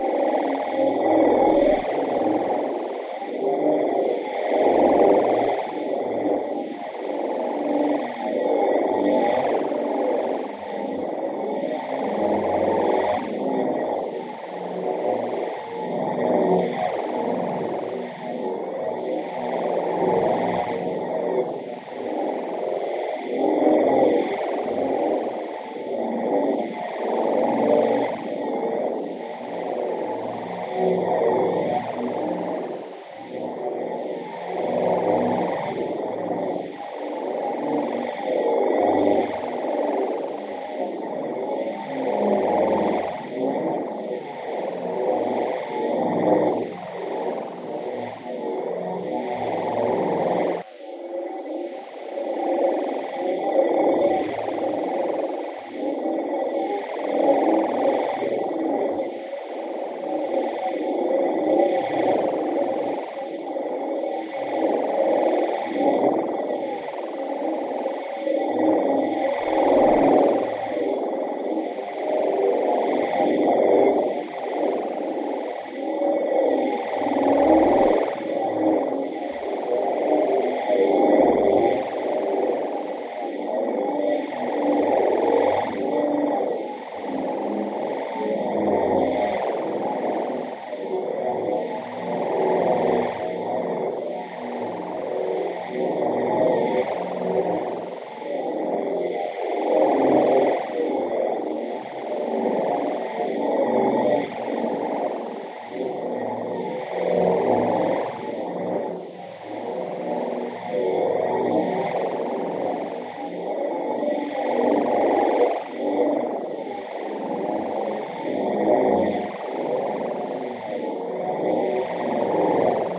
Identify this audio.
Weird ambient melody.
sci-fi, scary, space, weird, drone, ambient, industrial, atmosphere